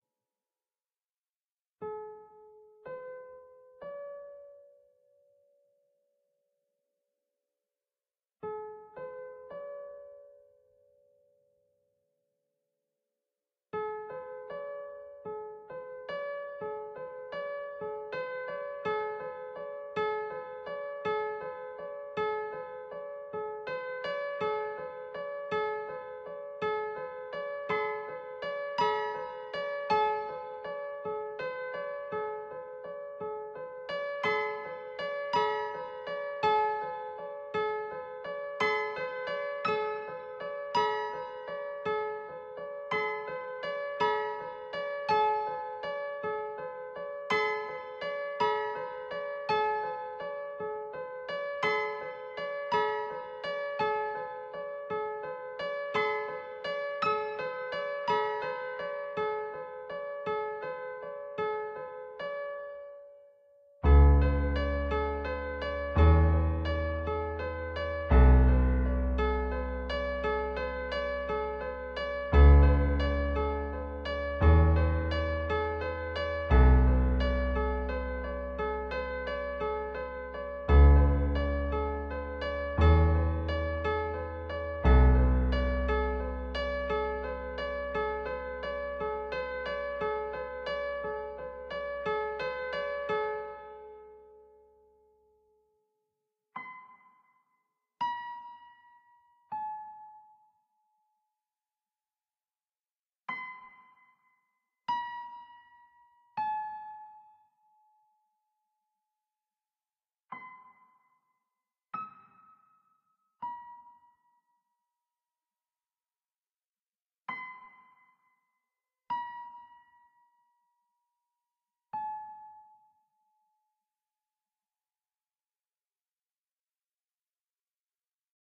Simple various piano music
A bit of sad various piano music, can be used for all kind of projects.
Created by using a synthesizer and recorded with a Zoom H5. Edited with audacity.